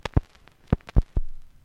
The stylus hitting the surface of a record, and then fitting into the groove.
analog, needle-drop, noise, record